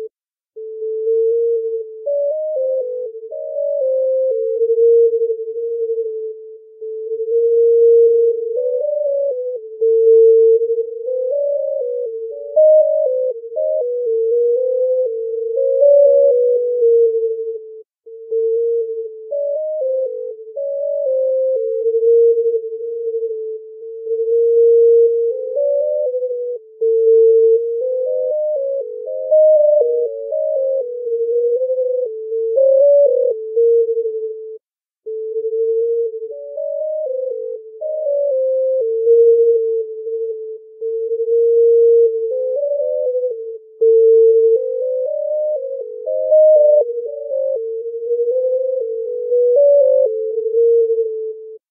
atlas3q-1osc-16bit
Sonification of collision display data from the CERN Large Hadron Collider. Sonification done by loading an image from the ATLAS live display and processing with a Max/MSP/Jitter patch. This is the oscillator bank channel of a three-channel rendering.
atlas, collider, data, experiment, hadron, large, lhc, oscillator, physics, proton, sonification